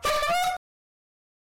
Clown Horn (Single Honk)
Use it in projects that will get much more attention than ours ever will.
cartoon, clown